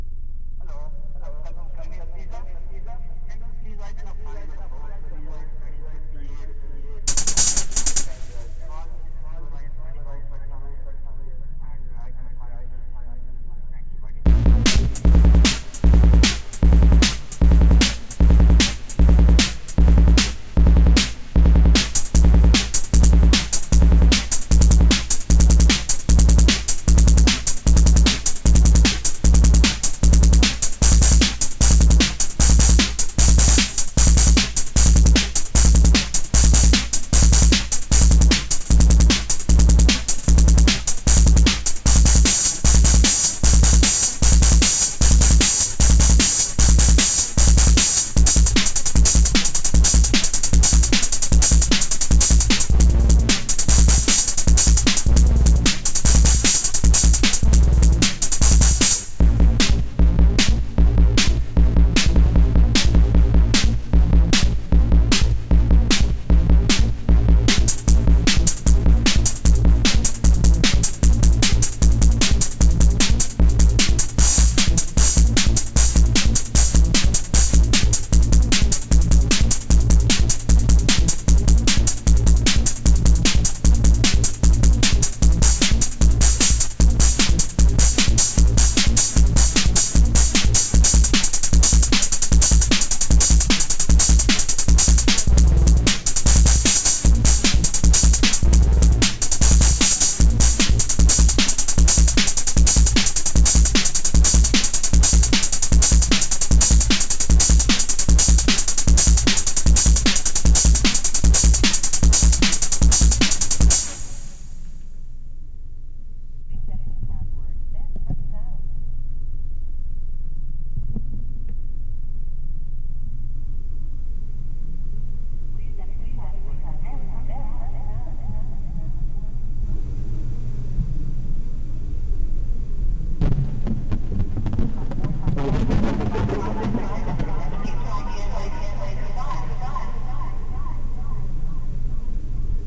Recorded some messages from my phone into audacity and added effects such as phaser and delay. Improvised over top of the messages with distorted tr606 beats.
techno, drum, human, beat, phone, delay, hardcore, tr606, extreme, voice, hard, bass, distortion, insane, accent, acid, hardware, indian, re20, space, echo, funny, recording, flange, phaser, loop, quadraverb, pizza, message, roland